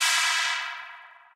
Just another time-stretch experimental noise. Now with time-stretched delay.